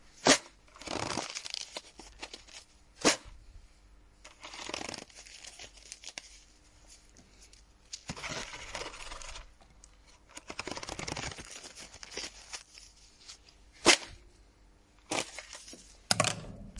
Paper Fan Open-Close
Me opening and closing a paper fan.
close, household, rrrt